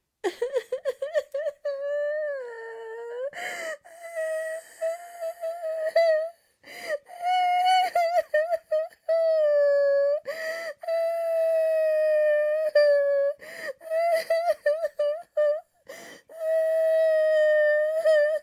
A woman crying dramatically.
Recorded with a Zoom H4N recorder and a Rode M3 Microphone.
crying, female-crying, sad, sobbing, upset, weep, whimper, woman-crying